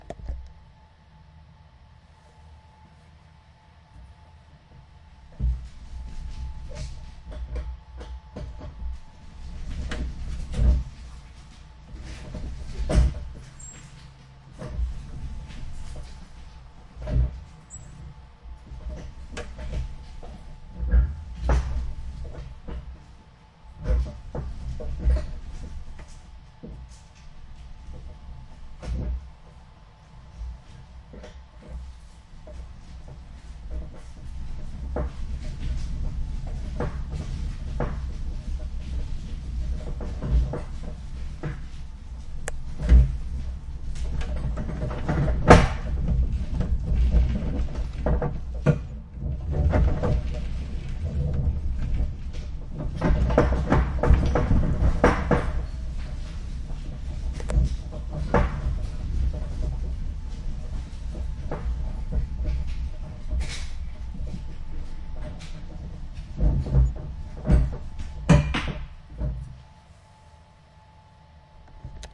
garage trash record wagon
trash, record, garage, wagon